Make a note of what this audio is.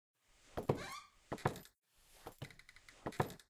A simple set of four footsteps which can be edited to loop.
creaking, Footsteps